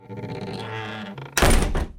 Door Close Long Sqeuak 01
Door closing with a creaking squeak
close, screen, squeak, creak, lock, door, lonng, unlock, wood